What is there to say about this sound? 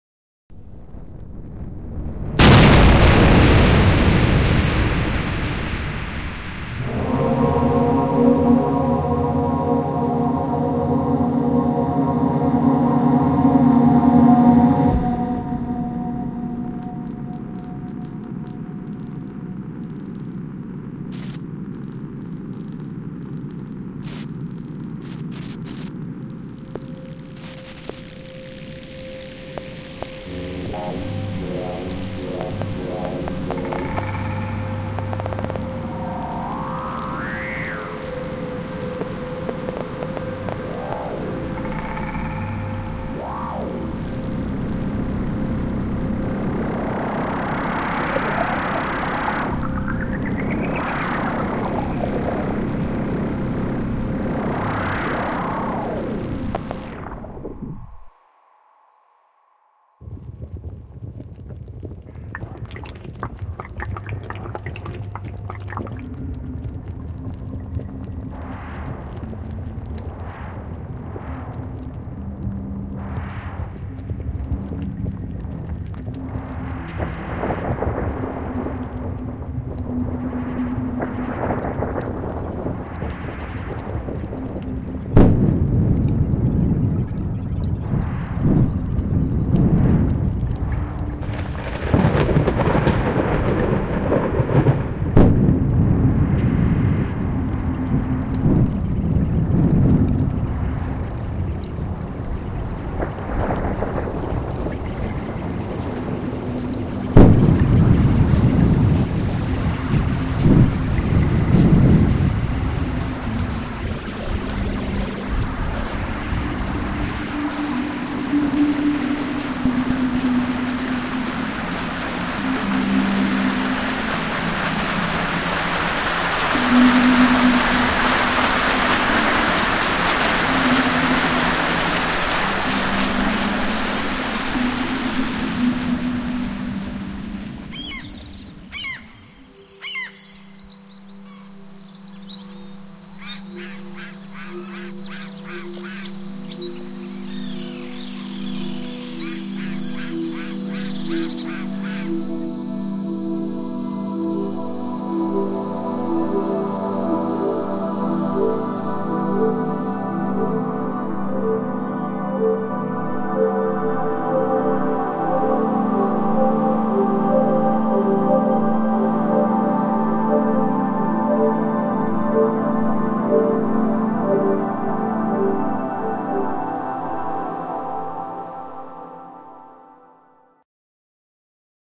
The Story of Universe - Chromones(Javi & Albin)
A soundscape conveys our interpretation of the origin of Universe. It mixes scientific, Vedic Hinduism and creative approaches. Starting from the very beginning (Big Bang and “OM” sound), passing through the universe expansion and focusing mostly on earth evolution, including molten rock and floods eras, as well as sounds from living creatures.